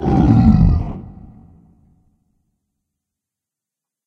I growled into a mic, then simply lowered the pitch using Audacity.